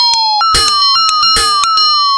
A rhythmic loop created with an ensemble from the Reaktor
User Library. This loop has a nice electro feel and the typical higher
frequency bell like content of frequency modulation. Experimental loop.
Mostly high frequencies. The tempo is 110 bpm and it lasts 1 measure 4/4. Mastered within Cubase SX and Wavelab using several plugins.